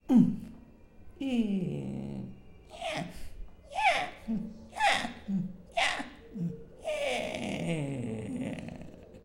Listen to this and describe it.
AmCS JH TI24 m iiii 'je 'je
Sound collected at Amsterdam Central Station as part of the Genetic Choir's Loop-Copy-Mutate project
Amsterdam, Central-Station, Time